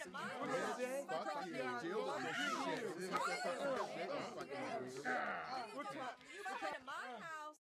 People fighting wala
People fighting and yelling at a guy
beat-up, fight, People-fighting